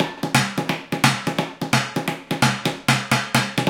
IMPROV PERCS 033 2 BARS 130 BPM
Sources were placed on the studio floor and played with two regular drumsticks. A central AKG C414 in omni config through NPNG preamp was the closest mic but in some cases an Audio Technica contact mic was also used. Two Josephson C617s through Millennia Media preamps captured the room ambience. Sources included water bottles, large vacuum cleaner pipes, a steel speaker stand, food containers and various other objects which were never meant to be used like this. All sources were recorded into Pro Tools through Frontier Design Group converters and large amounts of Beat Detective were employed to make something decent out of my terrible playing. Final processing was carried out in Cool Edit Pro. Recorded by Brady Leduc at Pulsworks Audio Arts.
loop, funky, groovy, improvised, loops, acoustic, drum-loop, percs, container, dance, cleaner, metal, beats, music, industrial, drums, break, hoover, drum, 130-bpm, garbage, food, breakbeat, fast, ambient, beat, bottle, percussion, perc, hard